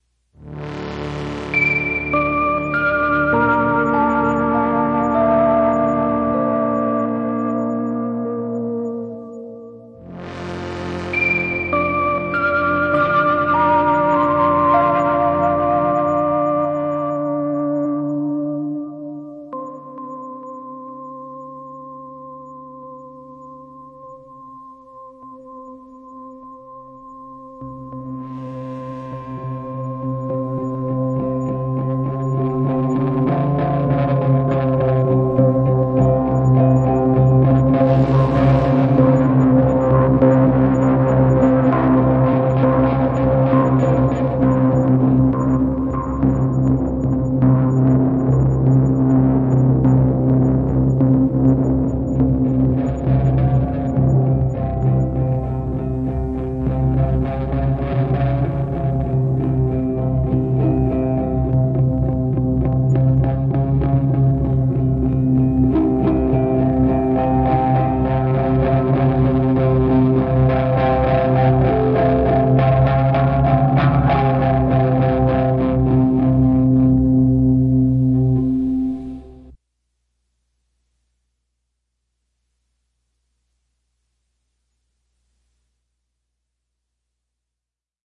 Dark analog like synth swells